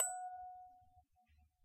clean fa kruis 4
eliasheunincks musicbox-samplepack, i just cleaned it. sounds less organic now.
musicbox, toy, metal, sample, note, clean